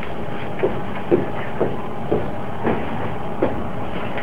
some footsteps recorded with my handy.